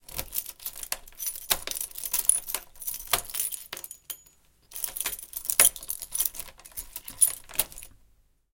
Sound of a lock. Sound recorded with a ZOOM H4N Pro.
Son d’une serrure. Son enregistré avec un ZOOM H4N Pro.